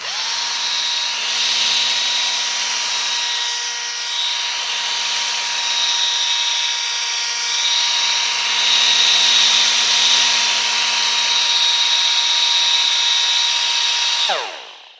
Straight die grinder - Run
Unbranded straight die grinder running freely.